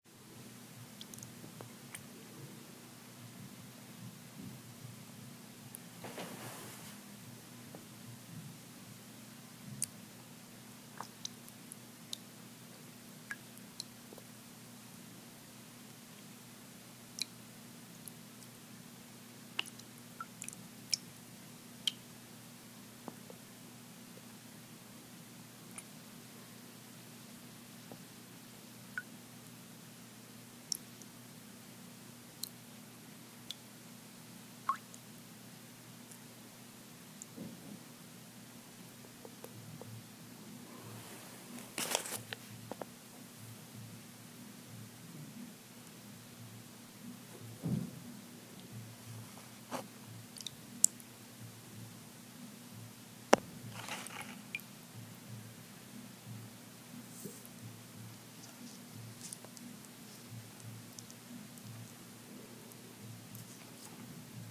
Intermittent drips in still water
nature, slow, drips, water, drip, outdoor